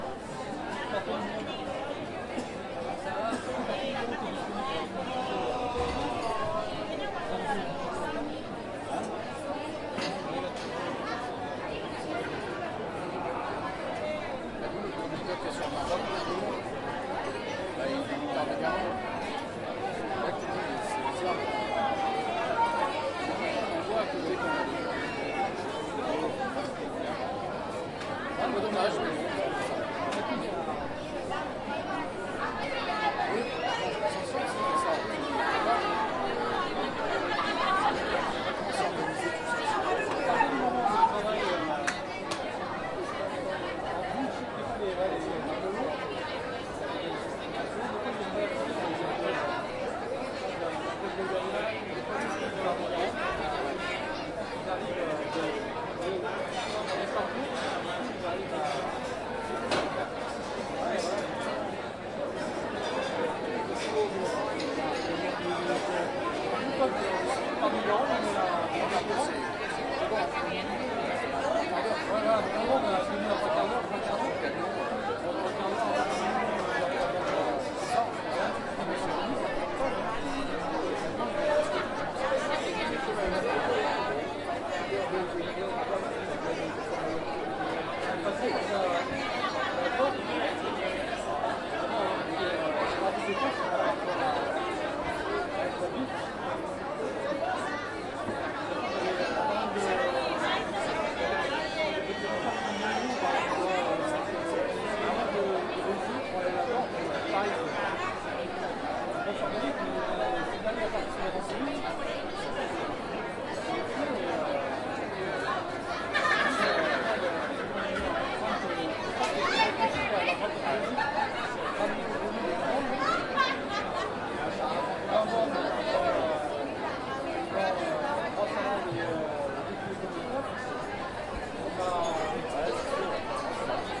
Food mkt ambience
interior recording of busy food market. Indistinct European voices in conversation & laughter.
ambience, field-recording, interior